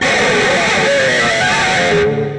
Scraping the bottom strings with my metal pick through zoom processor direct to record producer.
scrape, electric, string, guitar